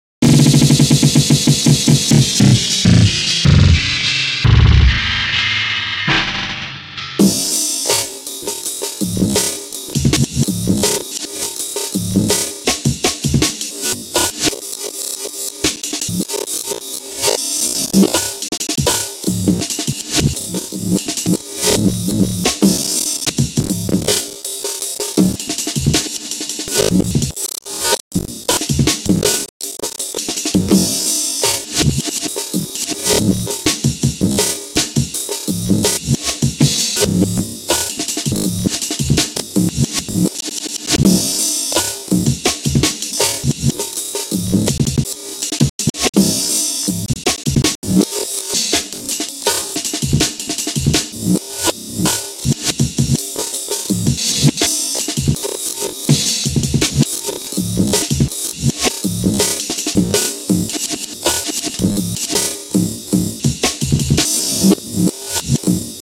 abstract mashed jungle-hop.R
a jungle mash, starts with a bunch of kicks/ crashes.....runs into a timestretched hip hop beat, interrupted by jungle flares (forward and backward).....reminds me of "knobbing"through an old am/fm tuner........
it was created in pro tools by putting the 2 beats side by side and sectioning them into one beat.
amen, bass, beat, brother, chopped, cut, drum, drums, jungle, mash, winstons